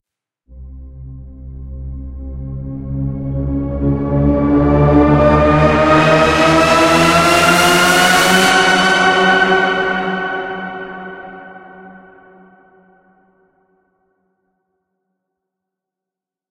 Entirely made with a synth and post-processing fx.
cinematic, dramatic, film, increasing, sfx, suspense